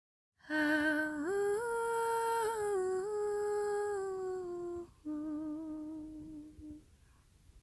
A female voice singing just a generic kind of'ooh':). Hopefully I'll be able to get the background noise cleaned again, as I can't do it myself.